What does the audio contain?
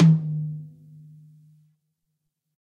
High Tom Of God Wet 004
tom, kit, set, drumset, pack, realistic, high, drum